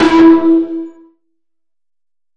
Was going for Percussive Synth aisian/bali gamelan...in a ridiculous way.
Synth, Gamelan